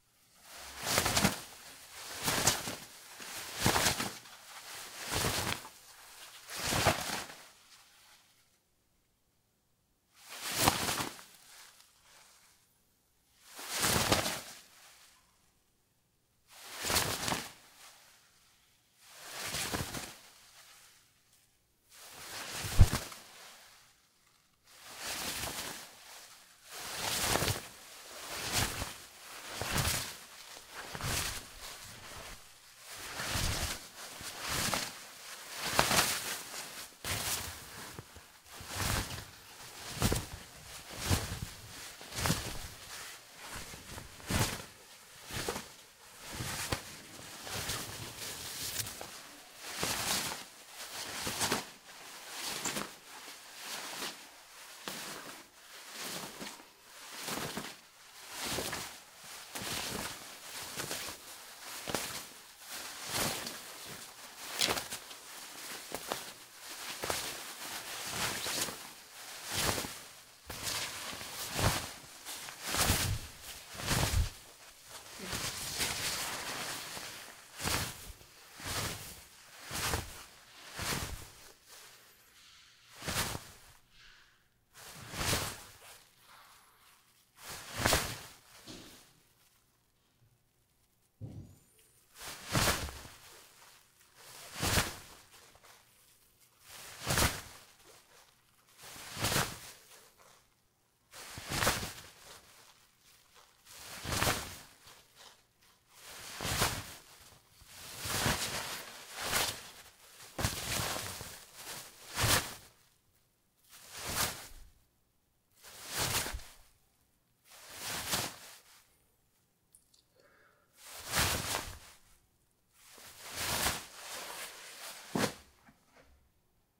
CLOTH Plastic Jacket 1
This is a jacket that is being flapped. Great for foley!
Movement, Jacket, Cloth, Flapping, Clothing, Plastic, Shacking, Foley